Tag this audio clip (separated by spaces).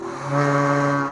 crazy a store lol rubber beast from thing noise